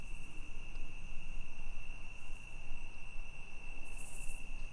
night sounds loop
This night sound was recorded in south Alabama in a bottom by a creek. It was recorded using a Zoom H1 with the Rode Videomic go attached a dead cat windscreen was used. The clip was cleaned up in Adobe Audition CS6. The clip is edited so it can be used as a loop.
ambient, atmosphere, loop, Night